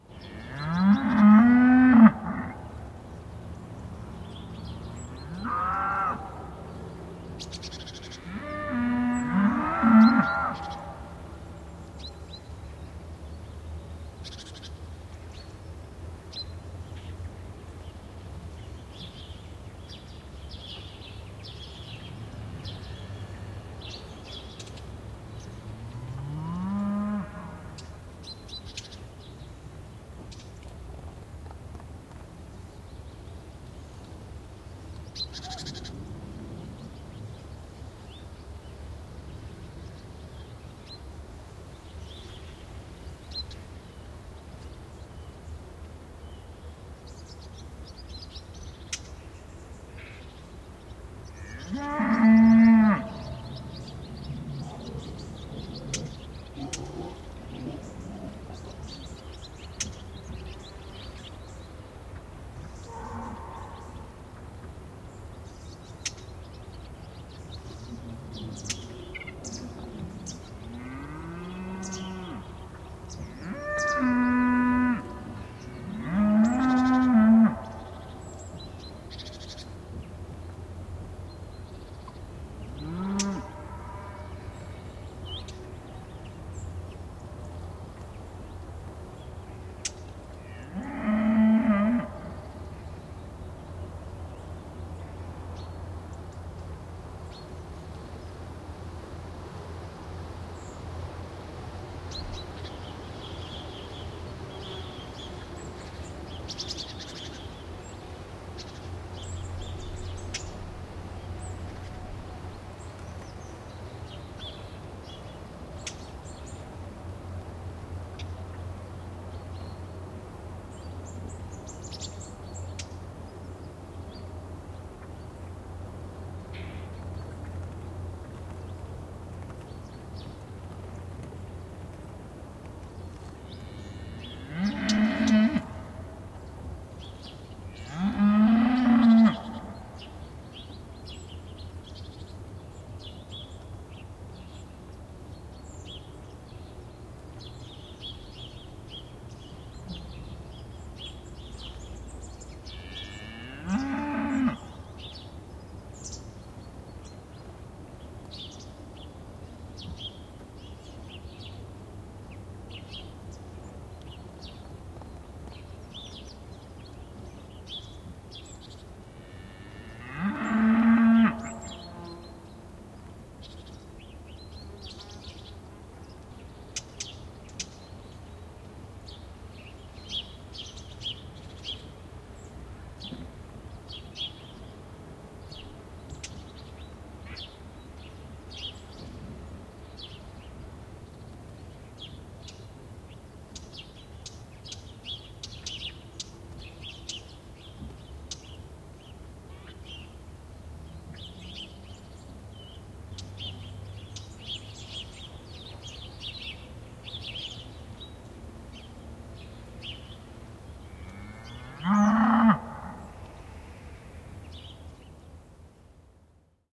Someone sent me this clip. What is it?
Maalaistalon piha, lehmät, linnut / Farmhouse yard in august, cows, birds
Kesä, maalaistalon piha elokuussa, lehmät ammuvat kauempana, pikkulintuja. Etäistä vaimeaa likennettä.
Paikka/Place: Suomi / Finland / Vihti / Haapakylä
Aika/Date: 17.08.1987
Tehosteet, Maatalous, Agriculture, Field-Recording, Suomi, Soundfx, Cattle, Karja, Summer, Countryside, Yleisradio, Finnish-Broadcasting-Company, Maaseutu, Country, Finland, Yle, Domestic-Animals